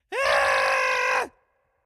short weird scream for processing "Eeeeh"